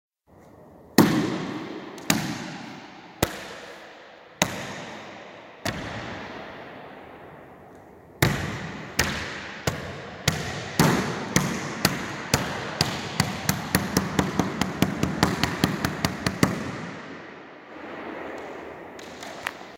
steps in corridor
I recorded this in a racket ball room/court for the cool echoing effects. You can use this for any creative thing!!
dreamlike, echo, unearthly